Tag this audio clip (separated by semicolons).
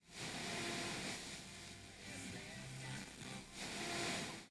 FM; radio; static